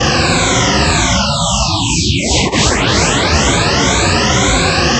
Energy sound created with coagula using original bitmap image of myself.
space, synth